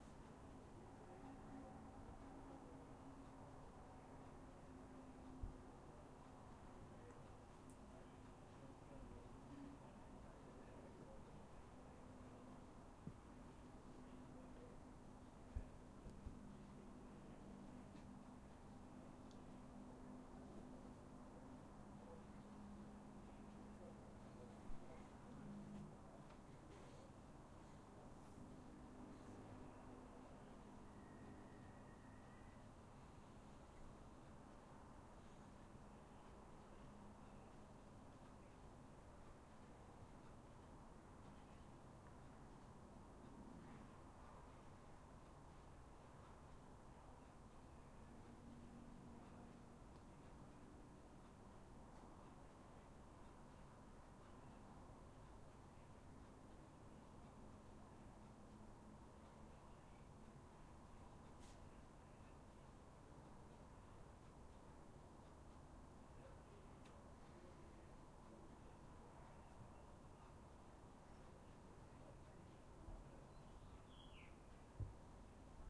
Ambience of 4 bedroom house
Ambiance soundtrack recorded inside my house
general-noise, roomtone, tone, room-noise